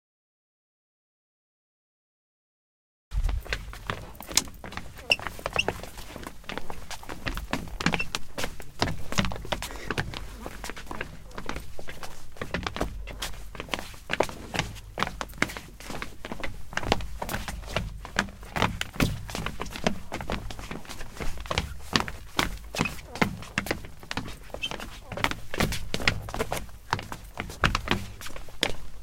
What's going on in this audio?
Pessoas trotando
Boom e Neumann
Gravado para a disciplina de Captação e Edição de Áudio do curso Rádio, TV e Internet, Universidade Anhembi Morumbi. São Paulo-SP. Brasil.
footstep, foot, step